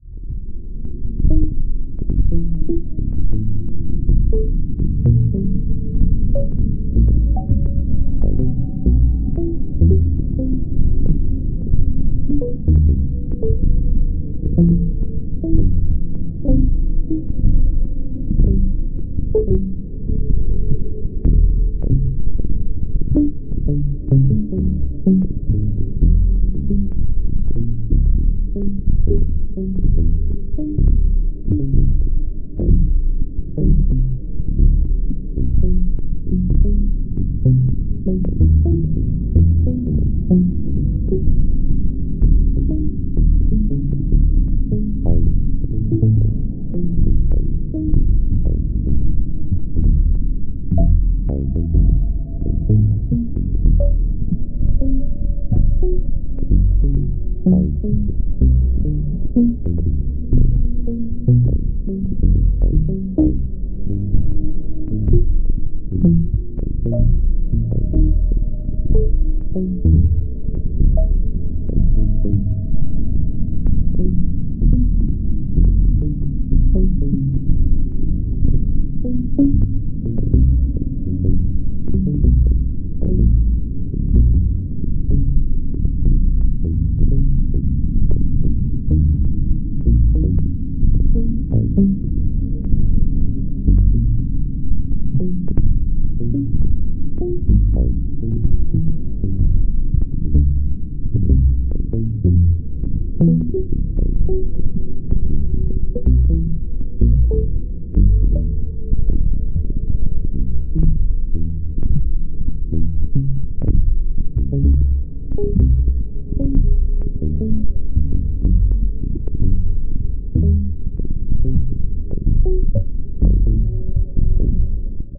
If you create a video game, where many bad robots,that's sample FOR YOU!
Simply, atmospheric drone-style, sound. Created in NI Reactor and Sony SoundForge.
Enjoy!